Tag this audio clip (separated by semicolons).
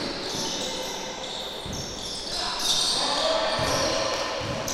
zapatillas; friccion